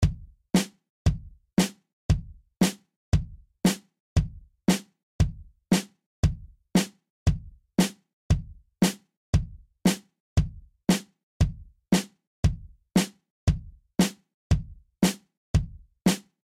Basic hithat
Melody, Music, Hithat